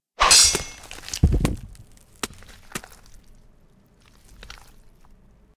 Beheading SFX #2
Because one beheading sound effect is just never enough. This time, the murderer continues to attack after the head has fallen off. Why? I don't know..
slice, gore, gross, beheading, head, mix